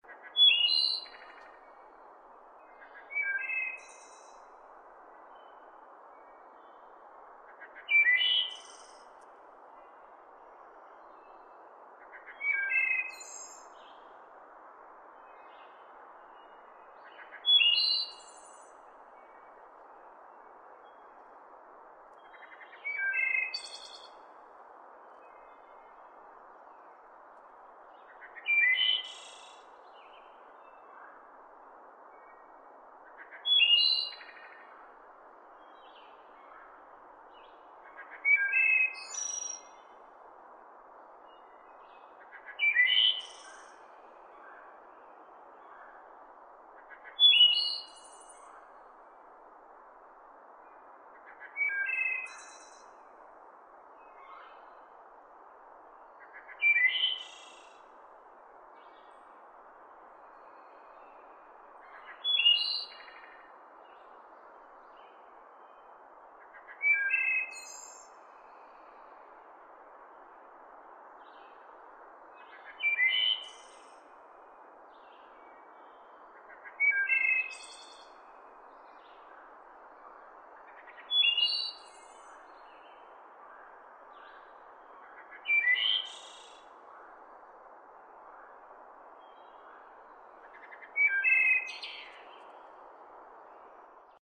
Wood thrush
fields, ambience, field, sound, recording, natural